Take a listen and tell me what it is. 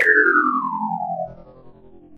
These parts were from Premonition which was on the Directors Cut LP back in 2003.